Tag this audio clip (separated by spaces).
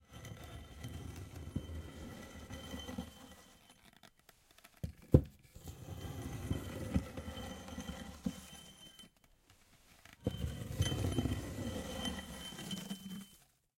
axe drag dragging floor